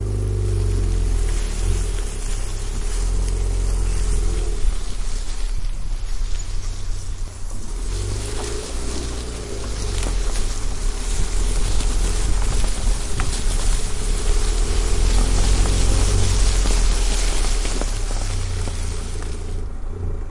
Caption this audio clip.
voiture en foret
Voiture roulant dans des feuilles, en forêt.
voiture, leaves, feuilles, leaf, nature, forest, car, foret